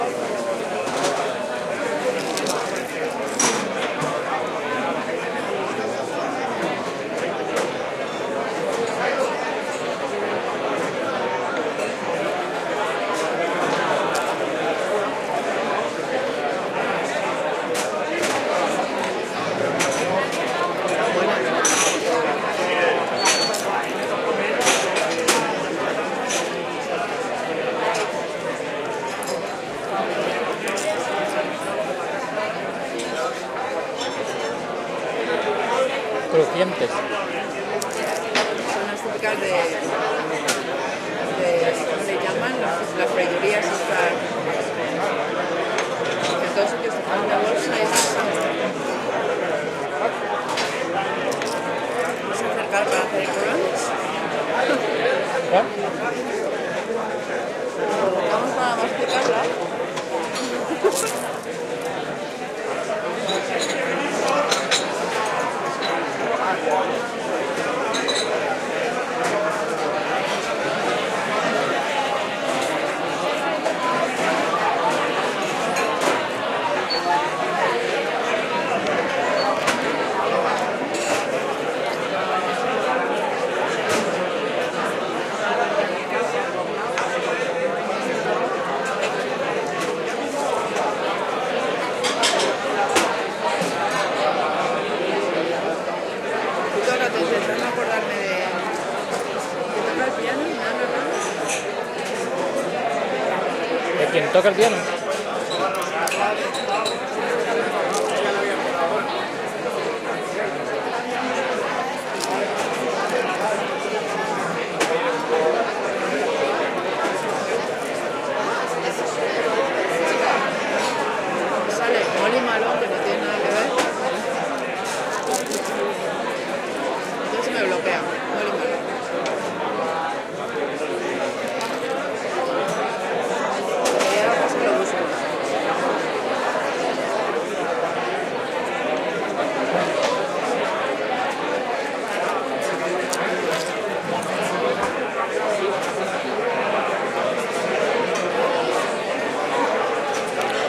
lively bar ambiance, noise of glasses, conversations in Spanish. Olympus LS10 recorder. Cerveceria Santa Barbara, Madrid